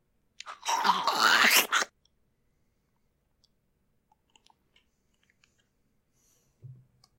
The sound of something or someone being ripped out of mud or some other liquidy mass trying to drag them under.